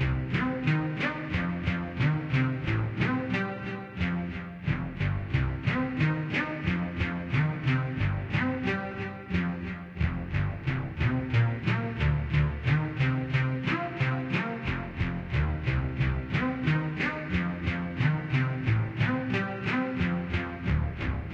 Part 4 of 5. 90-bpm supersaw arp.
Nothing special, just a bit of fill to sit between the low drone and flute / strings loops.
This segment is chopped from the middle part of 3 sections in order to carry over the stereo reverb tail into the start of the sequence. This part abruptly ends with no reverb tail 'cause it's meant to immediately flip back to the start to be repeated ad nauseum.
Created in FLStudio 11.04 with 3x oscilator VSTi, tap delay and a touch of reverb.